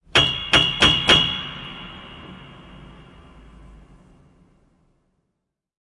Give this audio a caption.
Detuned Piano Stabs 5
series of broken piano recordings
made with zoom h4n